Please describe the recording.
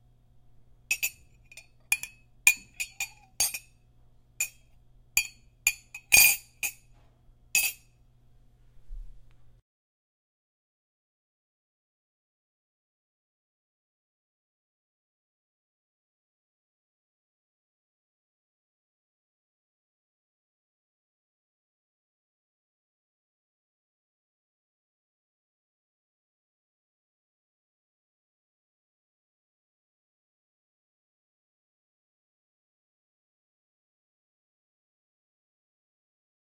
Spoon in coffee cup
A spoon clinking in a coffee cup
spoon, clink